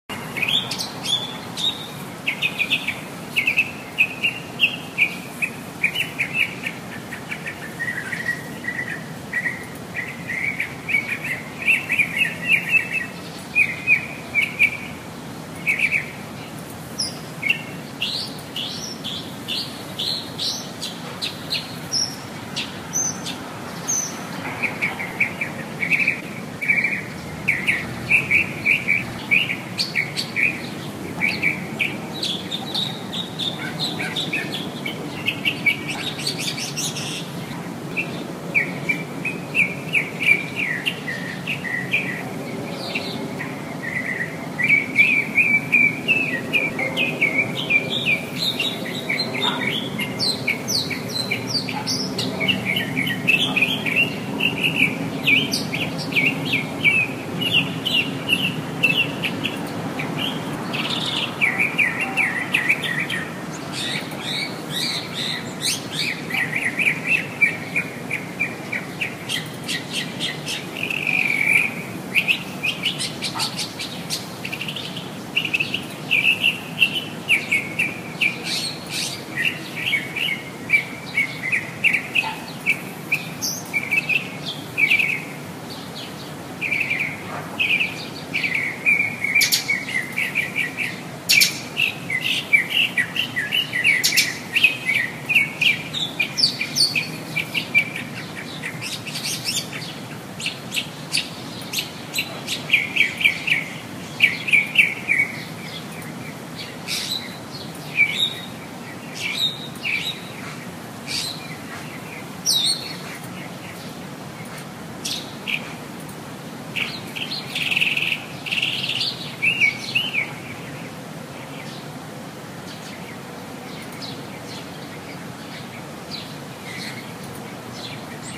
Happy Bird 2015-10-16
A mockingbird sings like crazy on a warm October afternoon in California.
amb, ambience, bird, birds, birdsong, field-recording, mockingbird, nature